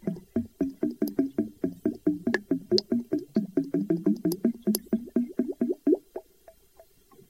This is the noise my bathtub faucet makes once you have turned off the water. Recorded with a Cold Gold contact mic into a Zoom H4.